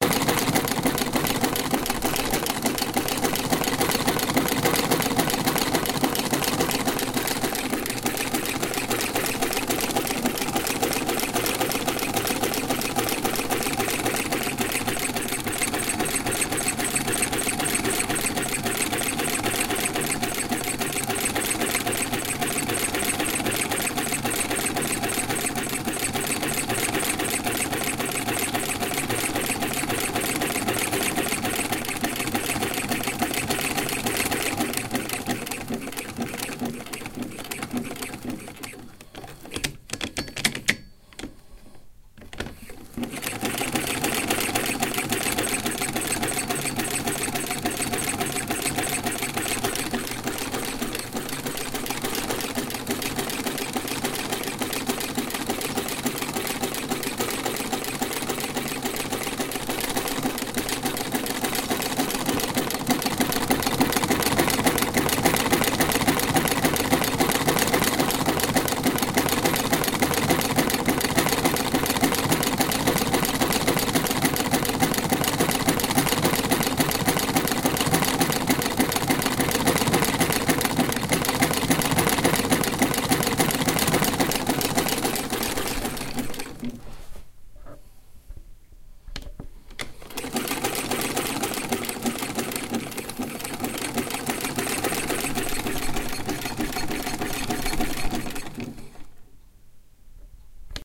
sewing machine field recording
mechanical, machinery, sewing, industrial, machine